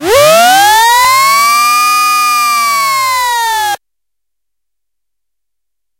This sample is part of the "K5005 multisample 08 dissonant pitchsweep"
sample pack. It is a multisample to import into your favorite sampler.
It is an experimental dissonant pitch sweep sound. The pitch goes up
and down. In the sample pack there are 16 samples evenly spread across
5 octaves (C1 till C6). The note in the sample name (C, E or G#) does
not indicate the pitch of the sound. The sound was created with the
K5005 ensemble from the user library of Reaktor. After that normalizing and fades were applied within Cubase SX.